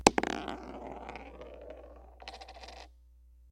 coin roll 1
Dropping a coin from a short distance onto my floor where the coin then rolls a short distance and falls over. Recorded with a contact mic taped to the floor going into a Zoom H4.
bounce, contact, floor, wood, click, coin, roll